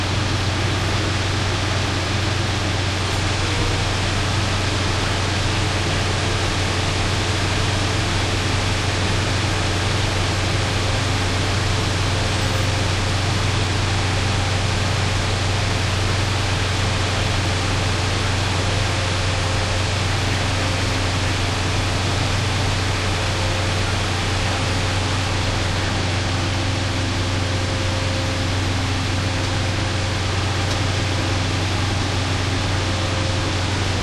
Sitting at an amazingly quiet drawbridge in the sun listening to nothing but the slight sonic halo of my vehicles engine and coolant system recorded with DS-40.
exterior, quiet, traffic, bridge, stopped, field-recording, car